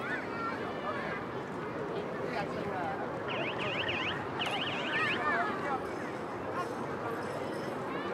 FX - parque infantil juguete electronico
child, toy